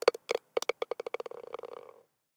Drop ball in cup-3
ball, golf, sfx